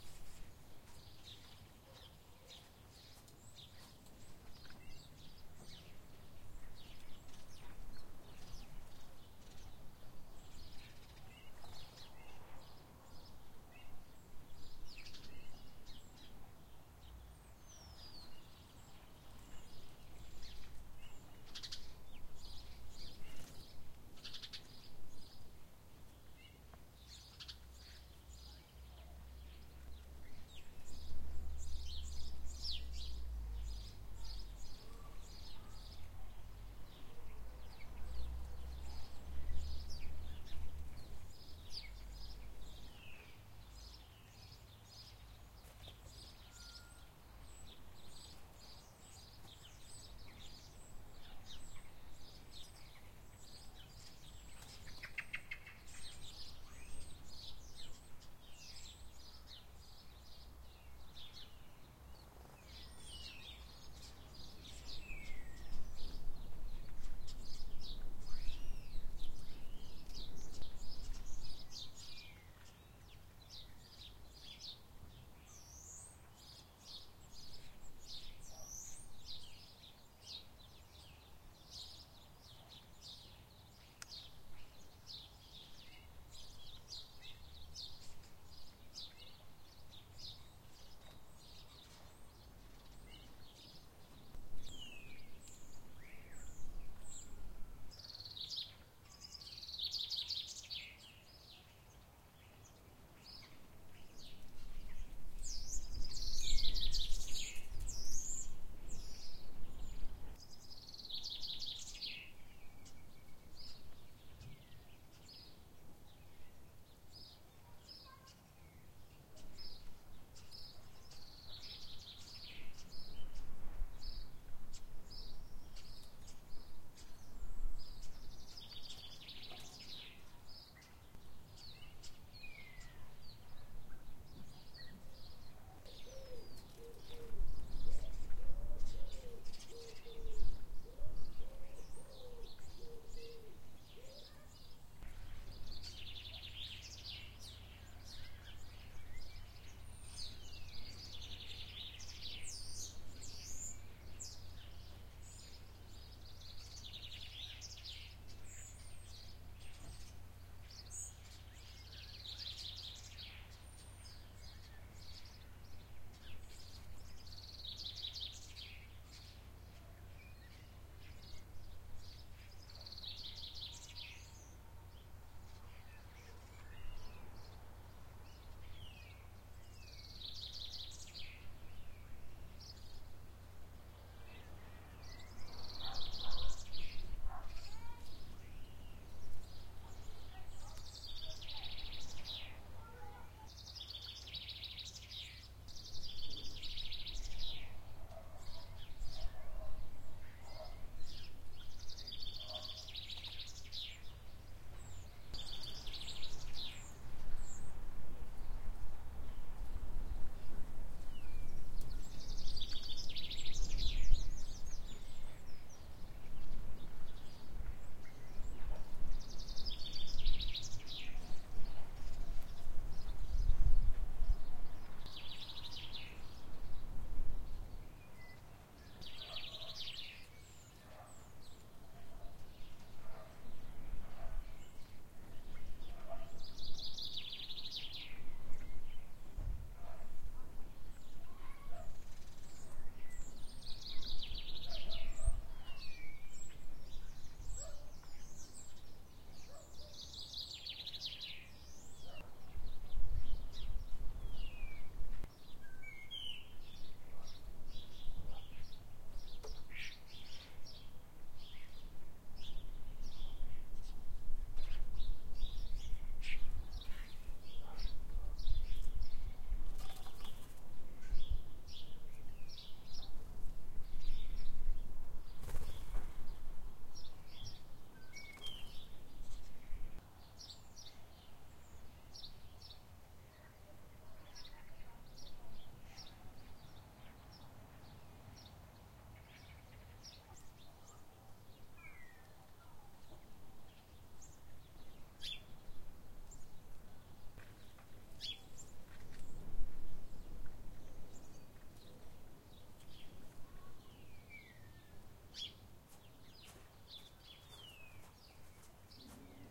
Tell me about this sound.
Sprrws Blackbird 0087
10am Sunday in the middle of the lockdown for Corona virus, Covid19. Recorder left in garden then edited down to remove most of dog barking at neighbours, the few cars that passed and distant conversation that might otherwise ruin the purpose of recording just the bird song. Not the best example of bird song for which I will have to get up at dawn.
semi-rural-garden, Blackbird, House-sparrows, collared-dove, chaffinch